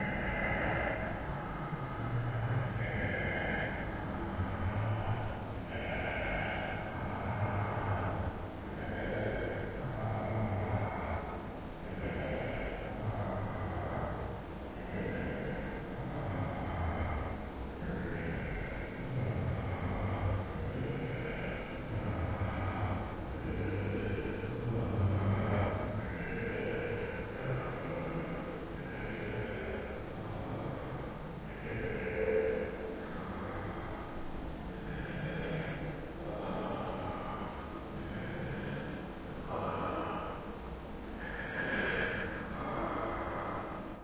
Killer Breathing 1
Breath, Breathing, Horror